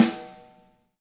SnDru-mf2
just a snare
1-shot, drum, snare